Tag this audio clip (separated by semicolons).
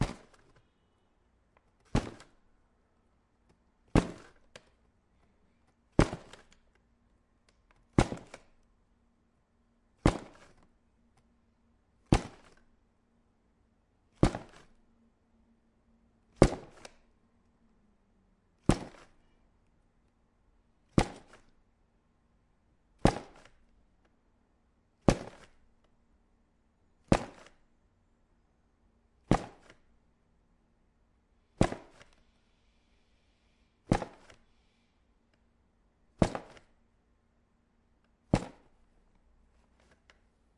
breathing; breath; inhale; steps; paper; cornflakes; exhale; box